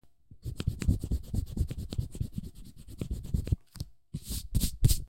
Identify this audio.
erasing pencil notes on paper
pencil, eraser, paper